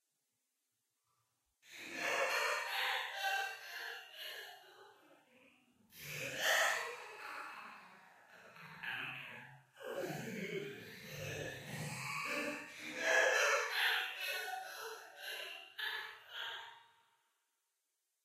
crazy laugh echo
a guy laughing psychotically in a tunnel or something
male, laughter, guy, crazy, echo, psychotic, laugh, evil, creepy, insane